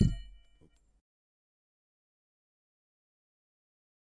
Heatsink Small - 15 - Audio - Audio 15
Various samples of a large and small heatsink being hit. Some computer noise and appended silences (due to a batch export).